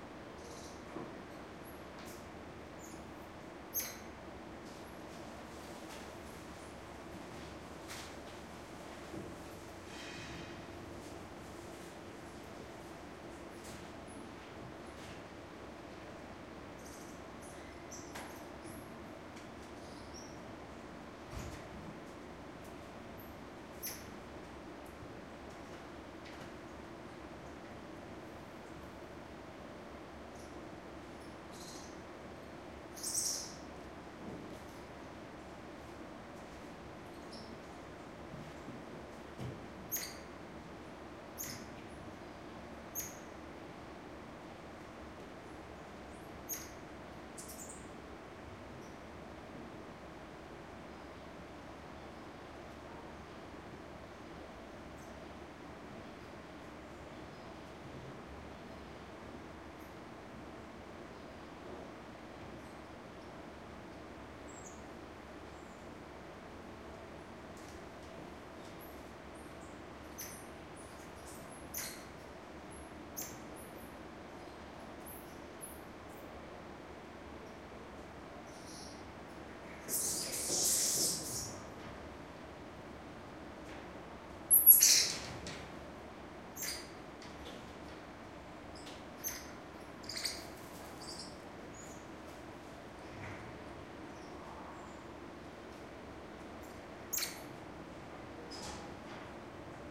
A small group of Common Squirrel Monkeys calling to each other and moving around in an indoor exhibit. Recorded with a Zoom H2.
squirrel-monkey, monkey, movement, cardinal, primates, chirp, field-recording